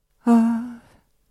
Female Voc txt Of
Short parts of never released songs.
If you want you are welcome to share the links to the tracks you used my samples in.
song, voice